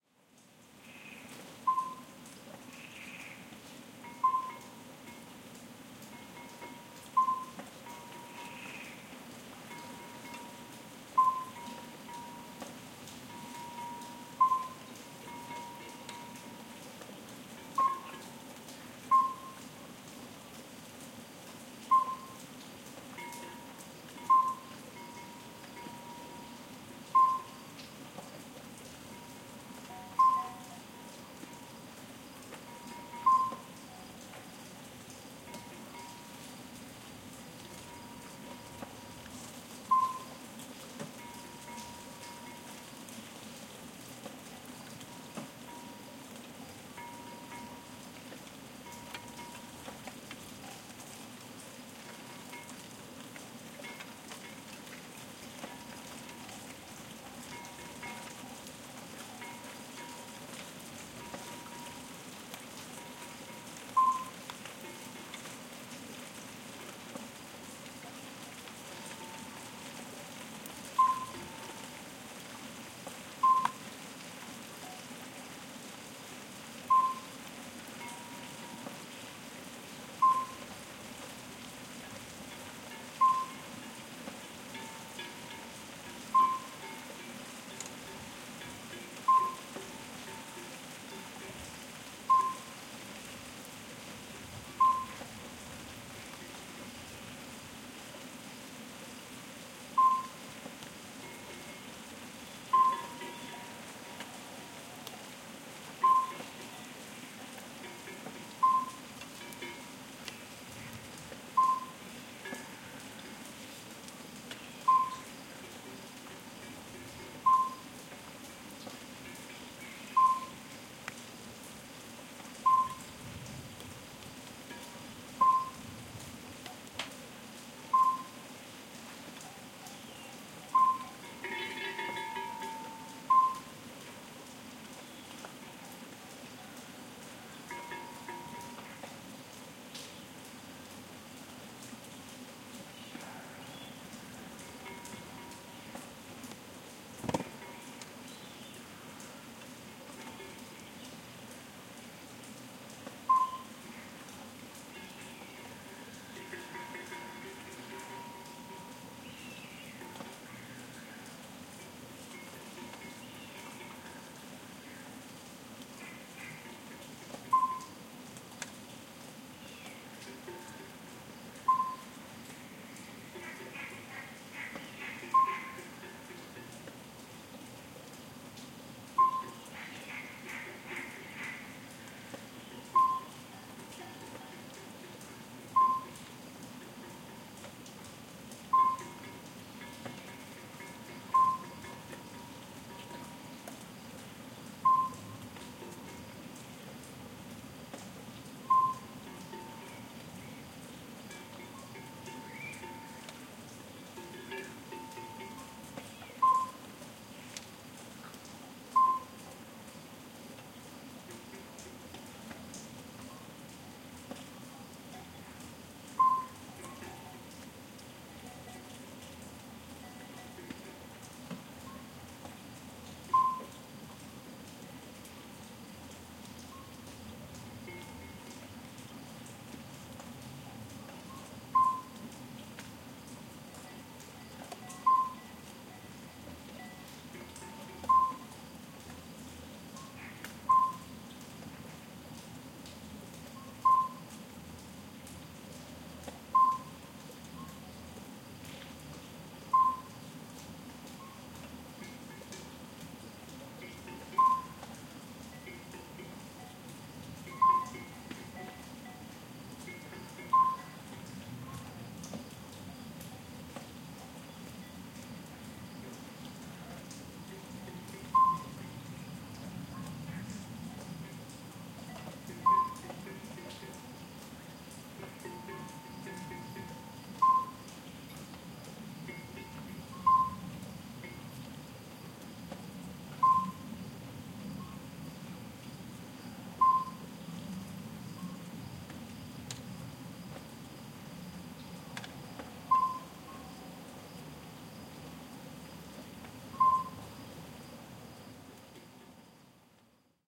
20190625.hamlet.night.085
Night ambiance in a N Spain little village during the summer. Soft rain, murmur of a small stream, and distant cowbell noise in background. Recorded at Mudá (Palencia Province), N Spain, using Audiotechnica BP4025 into Sound Devices Mixpre-3 with limiters off.
Alytes, ambiance, amphibian, cattle, common-midwife-toad, countryside, cowbell, crickets, field-recording, frog, night, rain, rural, stream, toad, village